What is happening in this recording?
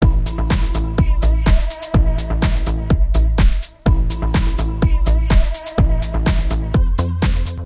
Made music clip with Music Maker Jam
BACKGROUND, GAMING, INTRO, TECHNO